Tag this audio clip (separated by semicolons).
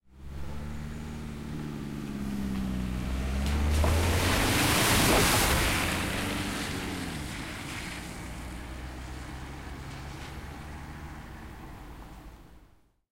car; water; field-recording